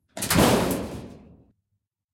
small-metal-hit-02
Metal rumbles, hits, and scraping sounds. Original sound was a shed door - all pieces of this pack were extracted from sound 264889 by EpicWizard.
rod, impact, iron, nails, hammer, scrape, metallic, hit, ting, shiny, bell, shield, industrial, steel, rumble, metal, factory, lock, pipe, percussion, industry, blacksmith, clang